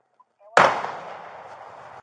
Gunshot Sound identify weapon
gunshot isolated sound. Identify from 2_11 mark
gun, shotgun, shot, shoot, ak47, rifle, pistol, field-recording, firing, shooting, weapon, gunshot